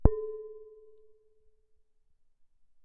glass-pot-top
I struck a glass pot top with my knuckle between two microphones